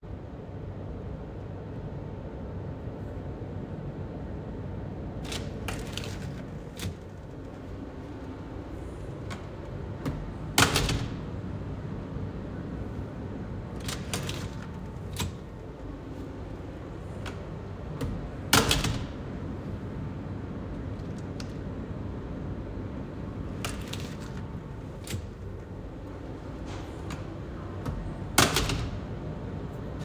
A heavy-duty studio door opening and closing from a loud, ambient hallway.